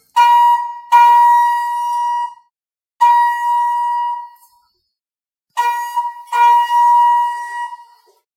Elevator Sounds - Beeping Sound
Sound of an elevator beep